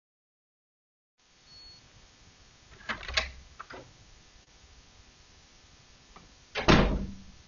Security door opening
A security locked door beeping and being opened and closed.
Security, door, open